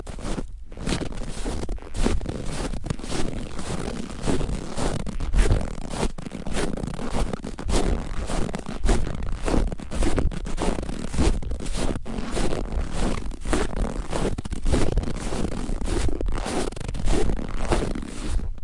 fotsteg på hård snö 6
Footsteps in hard snow. Recorded with Zoom H4.
footsteps, hard, snow